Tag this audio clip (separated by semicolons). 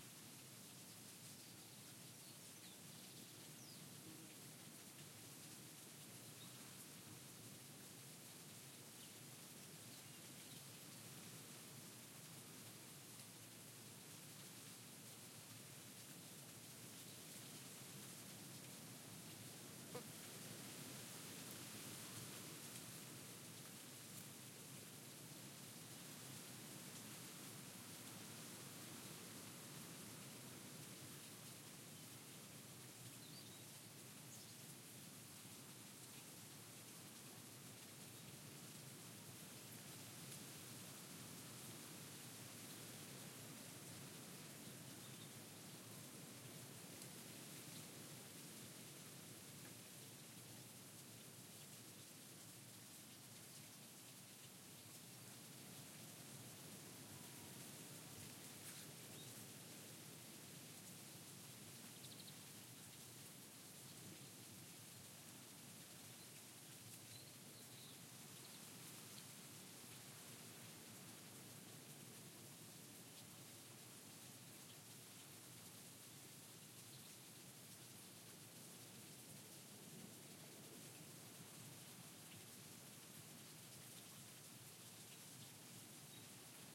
bird; fly; sea; water